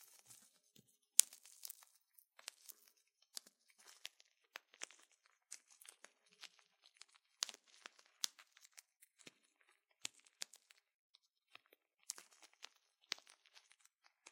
Popping bubble plastic
bubble-plastic, burst, popping